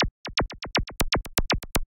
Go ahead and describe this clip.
This is a zappy break I made on my Akai XR10 drum machine, many years ago.